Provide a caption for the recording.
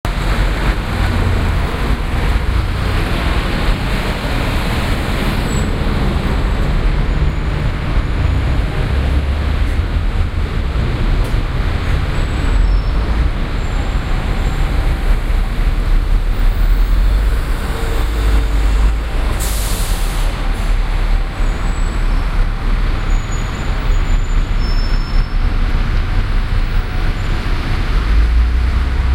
Euston - Bus Terminal
soundscape, atmosphere